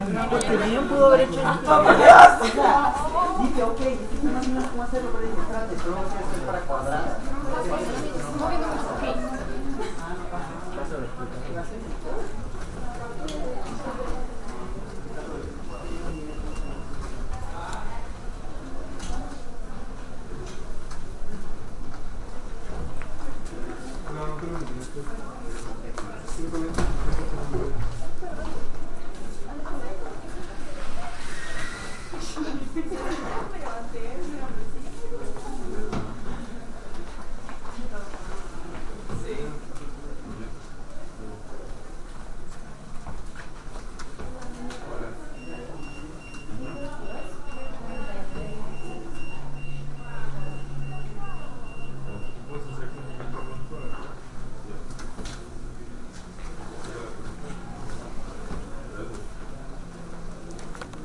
Ambient sounds made for my Sound Design class